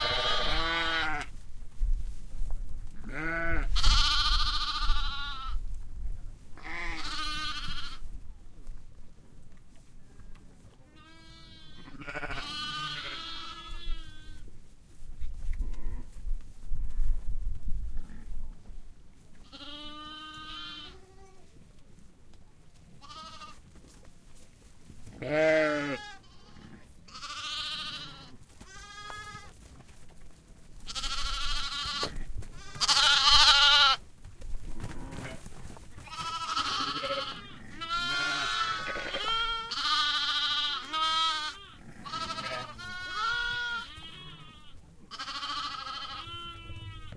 A dozen sheep with their little lambs born a few days ago, in a mountain hut, 1700 meters above sea level.
Mamma Rosa, Majella national Park, Italy